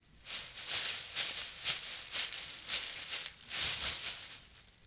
cartoon peek behind bush
cartoon style footsteps and peeking through bushes